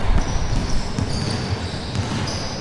Basketball shoes 7
Squeaking noise produced by friction with the shoes and the wood floor.
chirp, shoes, TheSoundMakers, UPF-CS13